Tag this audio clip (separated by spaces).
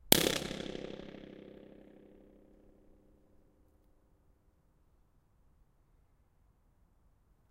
arch Balloon brick burst castlefield echo focus manchester